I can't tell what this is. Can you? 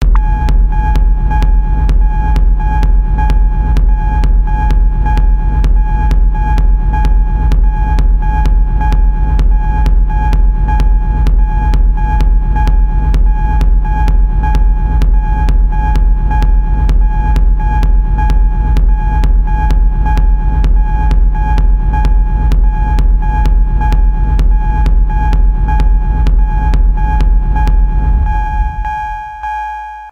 techno music loop